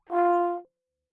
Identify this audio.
One-shot from Versilian Studios Chamber Orchestra 2: Community Edition sampling project.
Instrument family: Brass
Instrument: OldTrombone
Articulation: short
Note: F3
Midi note: 54
Room type: Band Rehearsal Space
Microphone: 2x SM-57 spaced pair
vsco-2, brass, multisample